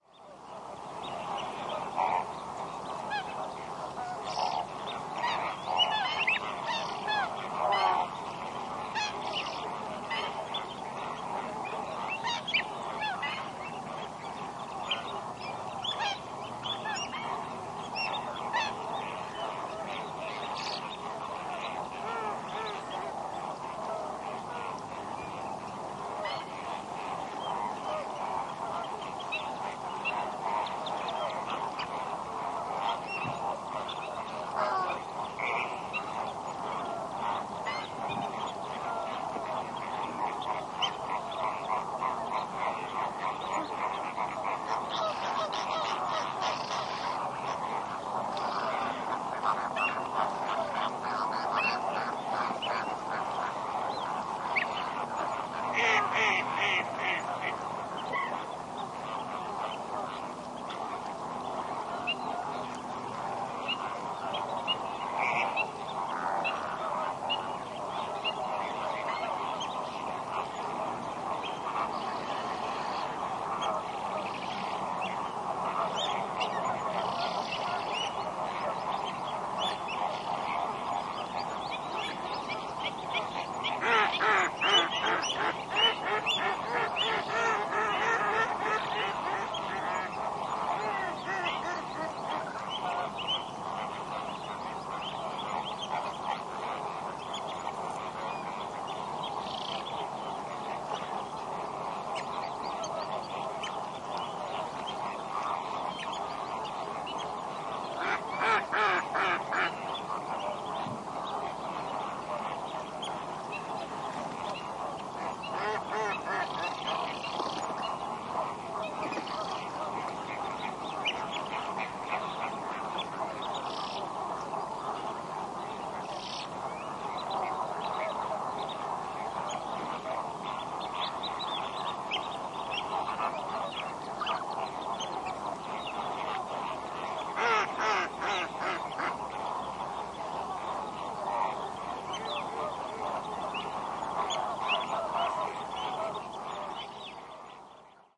20160922 summers.end.marshes
Callings from a variety of marsh bird (Greater Flamingo, Mallard, Black-winged Stilt, among others) that crowd at the only flooded pond in the Doñana marshes by the end of the summer. Primo EM172 capsules into FEL Microphone Amplifier BMA2, PCM-M10 recorder. Recorded at Dehesa de Abajo (Puebla del Rio, Sevilla Province, S Spain)